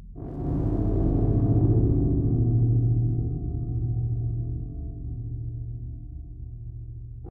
deep bass rumble 3
A distant bomb or deep bass rumble sound like deep bass rumble 2 but more resonant. Part of my Atmospheres and Soundscapes 2 pack which consists of sounds designed for use in music projects or as backgrounds intros and soundscapes for film and games.
bomb, electro, music, atmosphere, rumble, synth, ambience, boom, percussion, space, dark, city, processed, sci-fi, cinematic